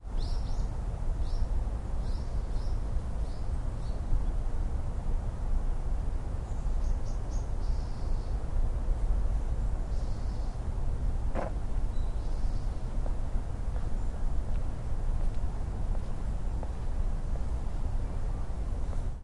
0054 Birds and footsteps
Birds and some footsteps. Background noise
20120116
seoul, korea, footsteps, birds, field-recording